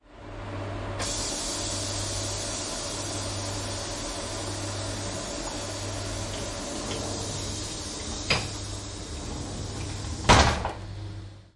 Recording of a pneumatic door closing on a tilt train.
Recorded using the Zoom H6 XY module.